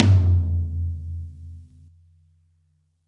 Middle Tom Of God Wet 014
drum, realistic, pack, drumset, kit, tom, set, middle